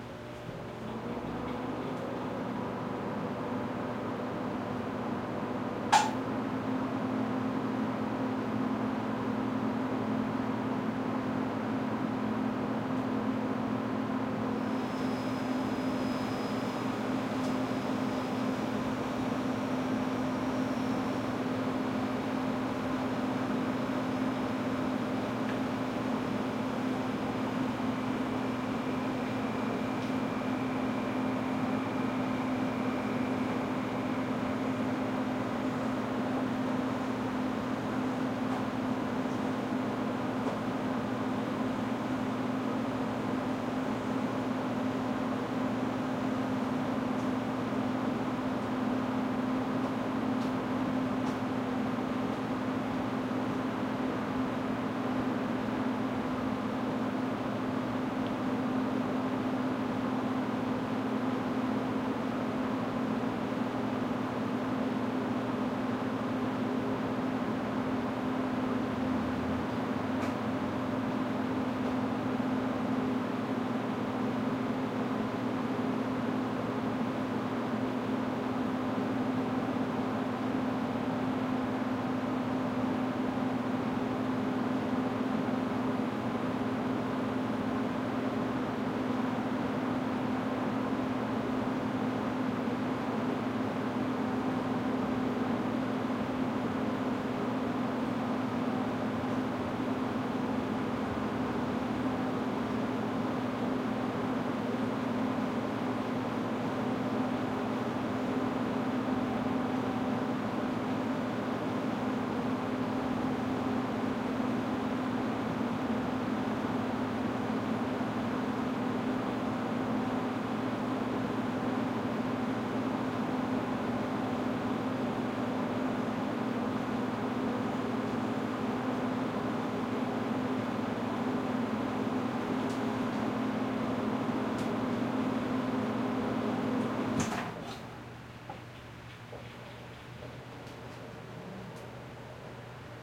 room tone depanneur corner store walk-in fridge open airy +start stop Montreal, Canada
open, stop, corner, tone, store, Canada, depanneur, airy, Montreal, room, start, walk-in, fridge